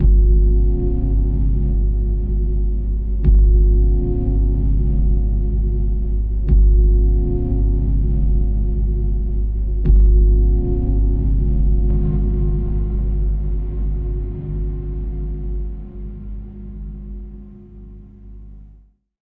Pad & Bass 1
Ambiance, Ambient, Atmosphere, Bass, Cinematic, commercial, Drone, Drums, Loop, Looping, Pad, Piano, Sound-Design, Synth